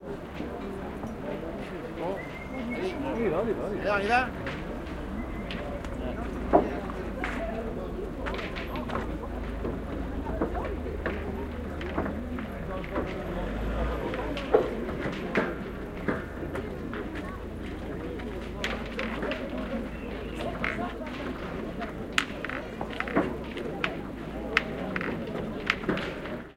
h907 boules 11f whistle
The sound of boules playing.
ambience, boules, field-recording, france, atmosphere